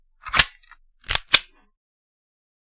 Gun reload
A sound from a softgun